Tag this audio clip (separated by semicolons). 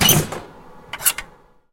industrial; paper; process